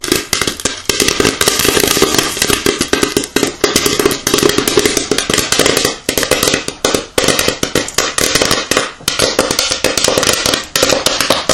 Pop Corn popping.
corn, pop, pop-corn, popping